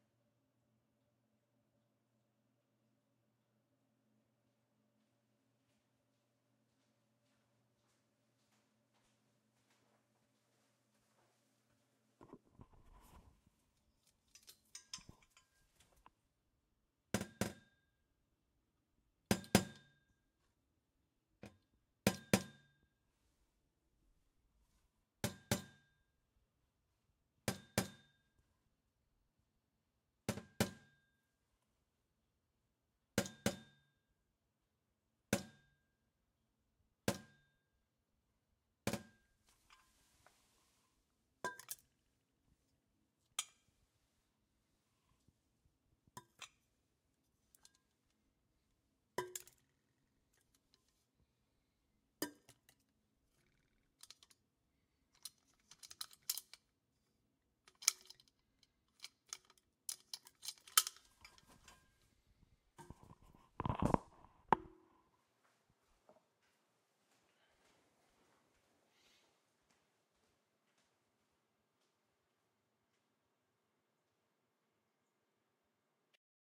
Thermos foley
A thermos being banged around for various effect.
container, dead-season, door, foley, thermos